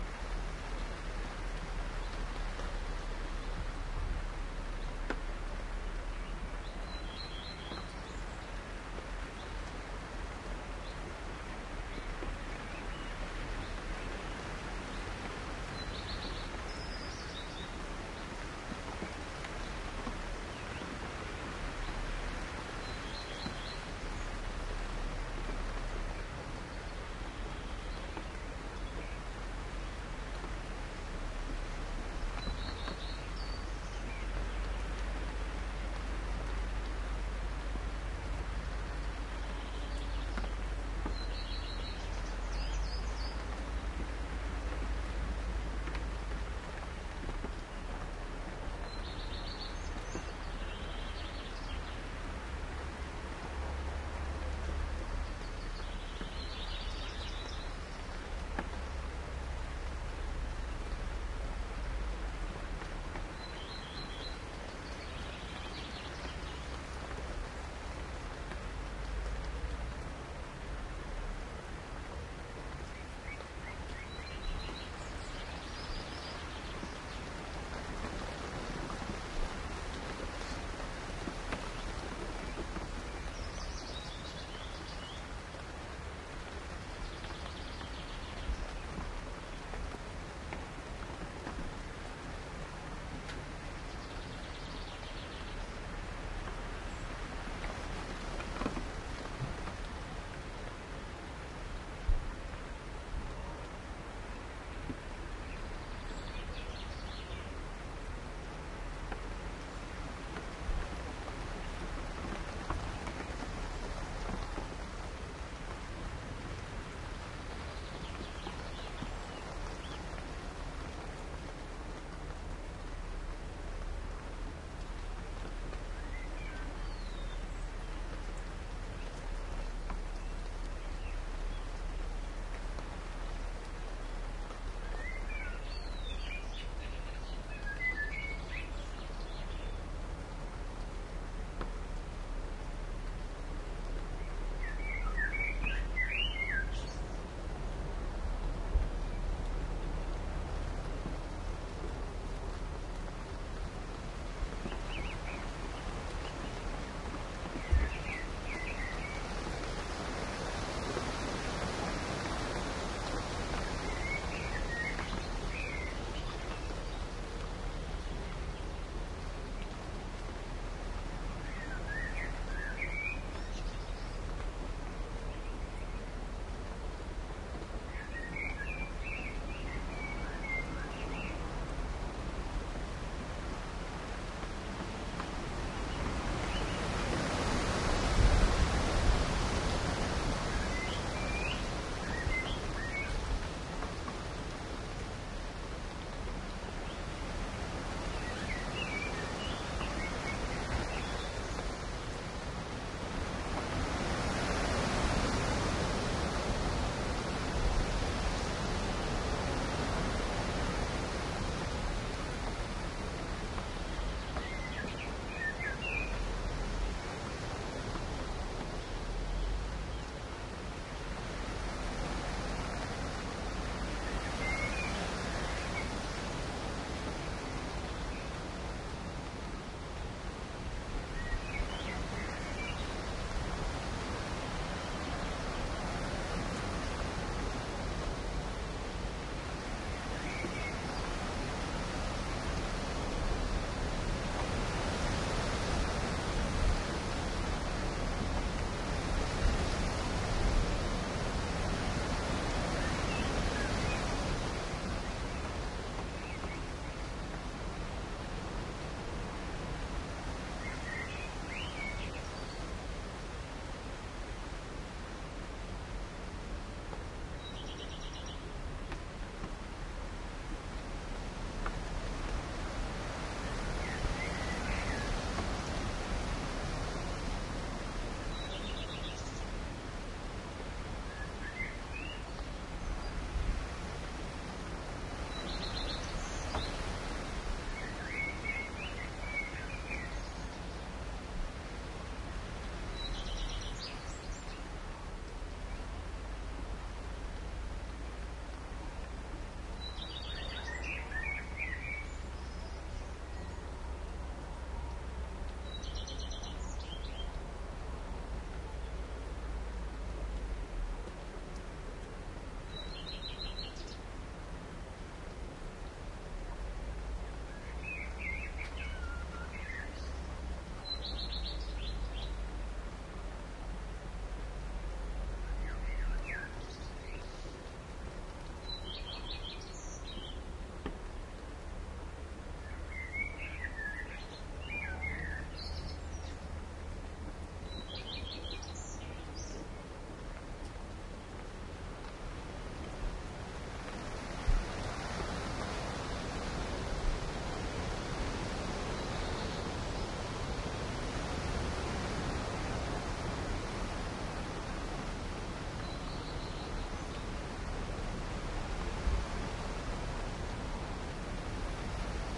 Rain in the Forest
Not a very clever recording, because those Soundman OKM
Microphones fell of the treestump, where I put them, without me
noticing it and they landed too close together to give a good
stereo-sound. Still, its rain and wind and some birds. Sony HiMD MiniDisc Recorder MZ-NH 1 in the PCM mode and the Soundman OKM II with the A 3 Adapter were used. And it all sounded and felt like being in a forest.
atmosphere, birdsong, denmark, field-recording, forest, nature, rain, wind